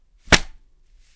folder snapped shut
A plain manila folder, the type used to hold papers in an office, being snapped shut sharply.